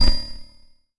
STAB 007 mastered 16 bit
An electronic percussive stab. A noisy industrial bell like sound.
Created with Metaphysical Function from Native Instruments. Further
edited using Cubase SX and mastered using Wavelab.
electronic, industrial, percussion, short, stab